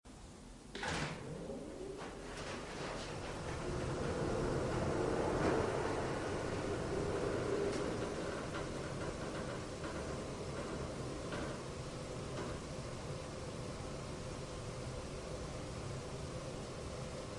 Raw audio of an electric-powered church organ being turned on.
An example of how you might credit is by putting this in the description/credits: